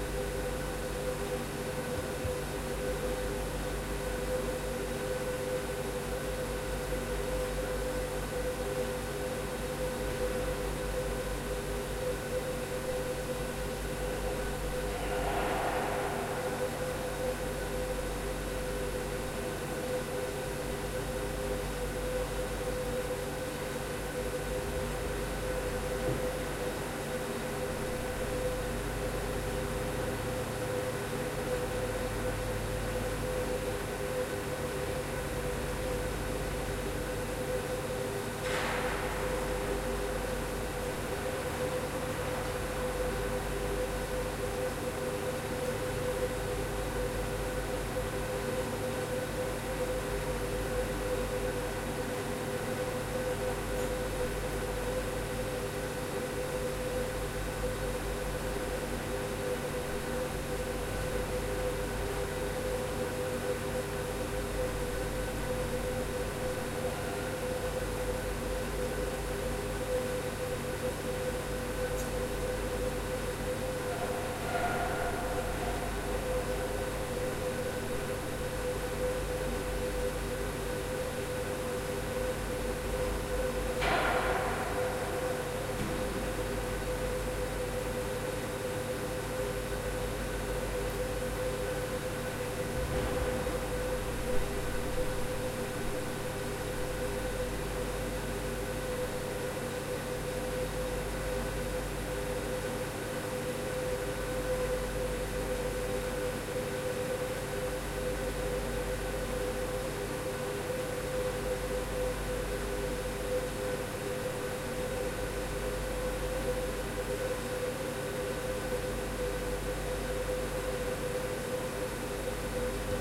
ambiant hangar sound
hangar early morning sounds would make a good space sound
air, ambiant, con, ecohes, hanger, sound